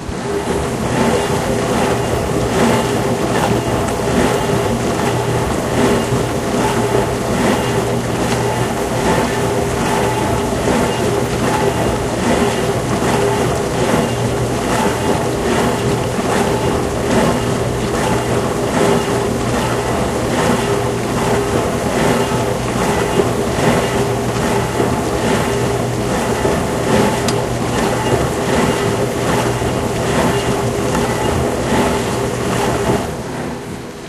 dishwasher harmonics
Sounds recorded while creating impulse responses with the DS-40.